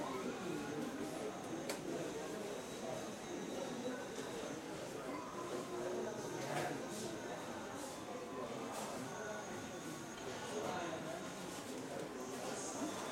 Field recording of ER ambience in Lusaka, Zambia. Sober sound, normal run-of-the-day ambience. Recorded on a C300.
Africa, ambience, ER, field-recording, hospital, medical, ward, Zambia